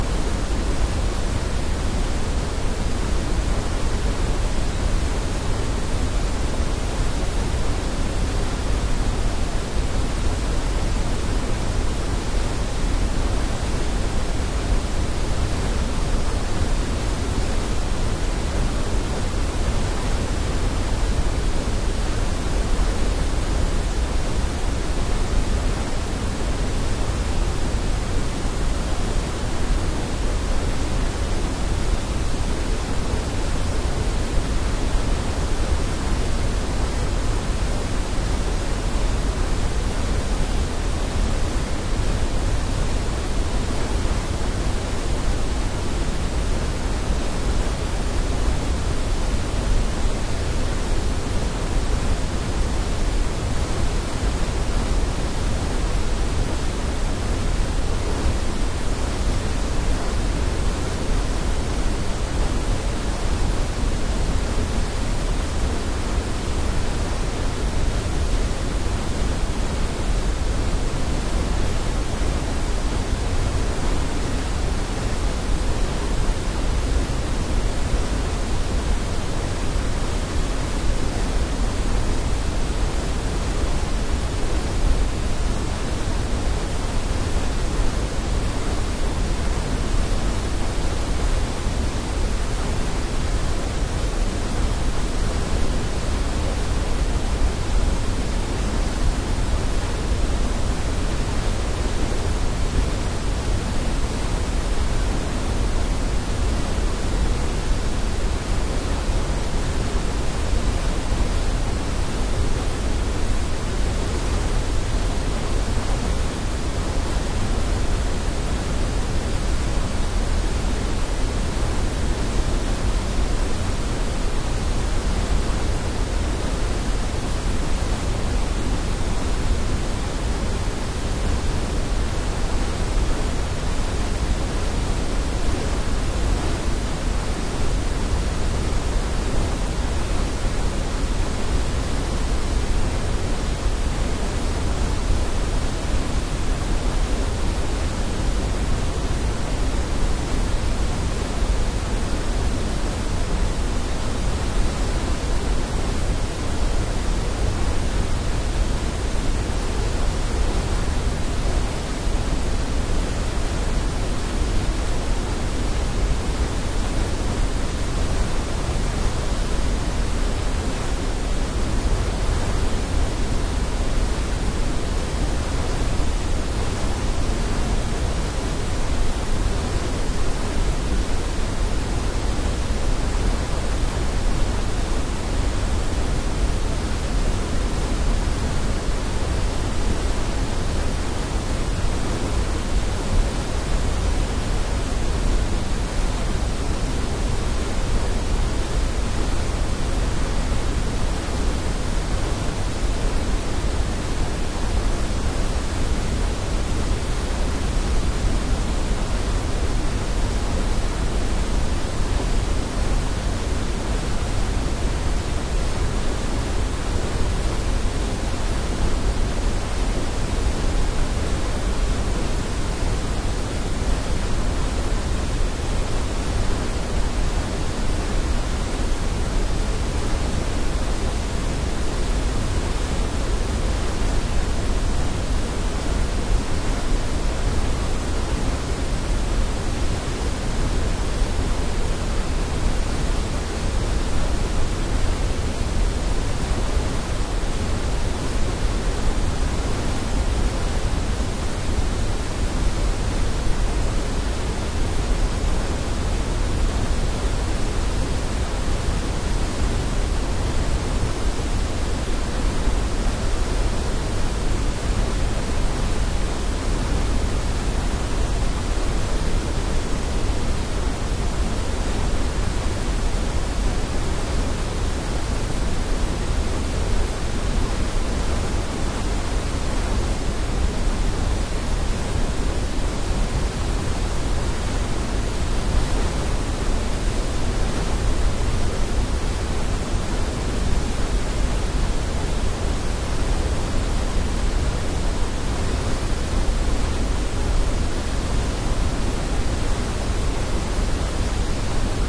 2013-04-12 falls creek falls
Falls Creek Falls drops about 200 feet over several drops into a shallow pool surrounded by high rock walls. In the springtime a lot of water is falling and makes a huge amount of noise. There is a lot of low frequency energy and this recording should be turned up really loud for the full effect.
Recorded with a pair of Sound Professional binaural mics (MS-TFB-2) inside a Rode Blimp into a modified Marantz PMD661.
ambient, creek, field-recording, gifford-pinchot, loud, nature, outside, washington, waterfall